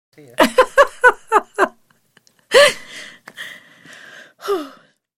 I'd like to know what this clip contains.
CLOSE FEMALE LAUGH 048
A well-known author visited the studio to record the 'audio book' version of her novel for her publisher. During the 16 hours (!) it took to record the 90,000 word story we got on really well and our jolly banter made it onto the unedited tracks. The author has given me permission to keep and share her laughter as long as I don't release her identity. Recorded with the incredible Josephson C720 microphone through NPNG preamp and Empirical Labs compression. Tracked to Pro Tools with final edits performed in Cool Edit Pro. At some points my voice may be heard through the talkback and there are some movement noises and paper shuffling etc. There is also the occasional spoken word. I'm not sure why some of these samples are clipped to snot; probably a Pro Tools gremlin. Still, it doesn't sound too bad.
book, close, empirical, funny, giggle, guffaw, happiness, humour, jolly, josephson, joy, labs, mirth, over, voice, voiceover, woman